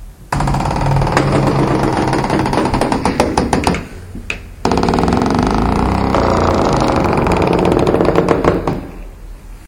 durys geros3
wooden door squeaks